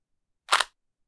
Rubik Cube Turn - 25
Rubik cube being turned
board, click, cube, game, magic, plastic, puzzle, rubik, rubix